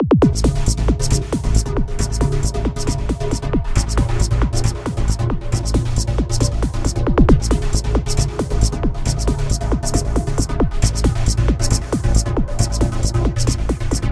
night club beat by kris sample
did this on ableton live by scratch hope u like it :D